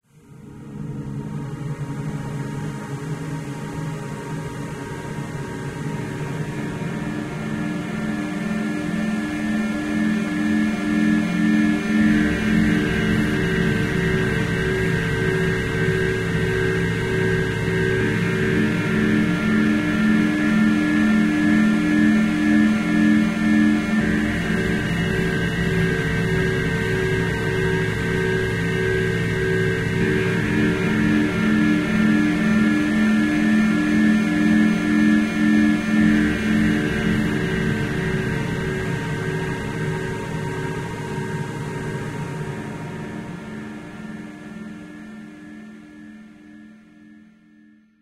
mysterious synth drone loop
completely made on computer, with VST synths and effects. There's some low-end missing which I suggest you add if you're mixing this :-)
suspence, mysterious, sound-design, creepy, drone, unearthly, spooky, synth, illbient, dark, alien, effect, scary, ambience, loop, ambient, rhythmic-drone, horror